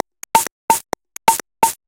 dist perc loop 1
a minimal percussion loop
minimal, percussion, loop